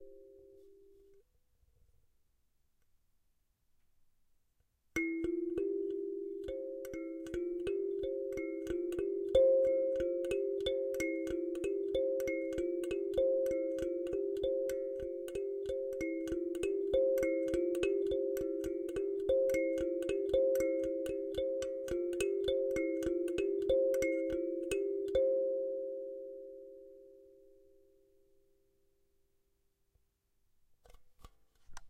Kalimba original melody